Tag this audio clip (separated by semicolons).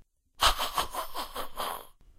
Goblin; Cackle; Laugh